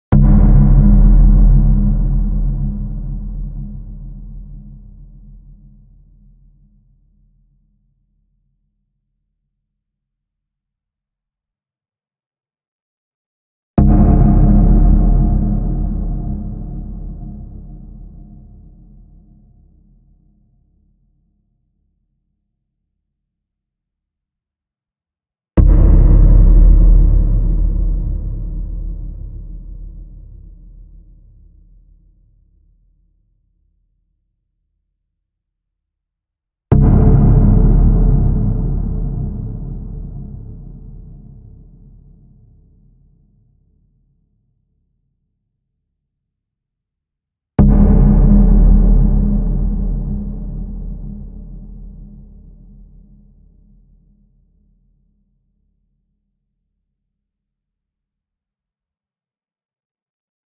A reverb for background effects in videos and music.
creepy, dong, haunted, spooky